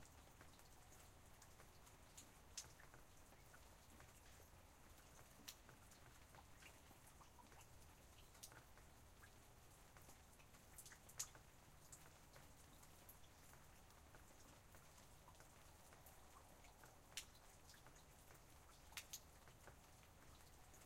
outside-rain-stopped1
The aftermath of a rainy morning, as heard from my front porch.